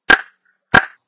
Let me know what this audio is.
Sound made by clapping a ruler on a table.
(Use to simulate a clapping crocodile jaws...)
clapping, clop, clopping, crocodile, clap